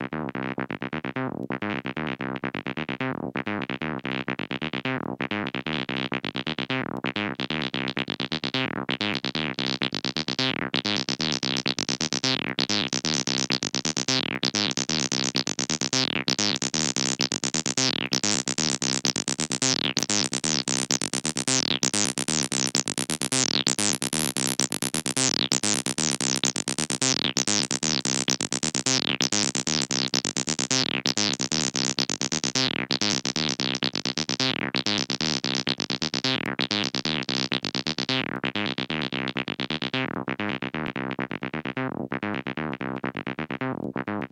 TB303 Made with Acid machine 130BPM
techno, loop, acid, house, tb303, tb303squarewave, retro, 303, squarewave, bassline, synth, electronic